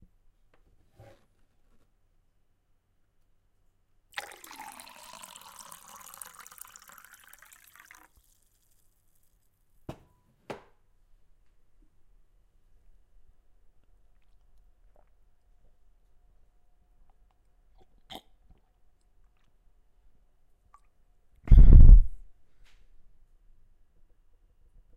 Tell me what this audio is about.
Pouring water into glass and drinking.
Recorded with Zoom H6 X/Y
drinking, pour, drink, splash, water, glass, pouring, into, liquid